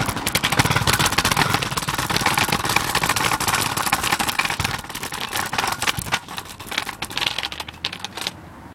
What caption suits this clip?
A kid's scooter being dragged along a cobbled pedesteianized street.
brick, bumpy, scooter, field-recording, wheel, cobbled